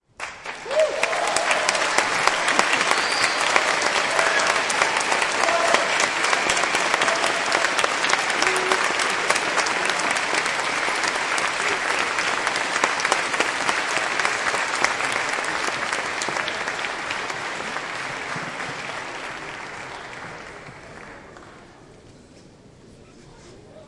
applause int large crowd church5
crowd, int, large